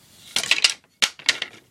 Various sounds made by dropping thin pieces of wood.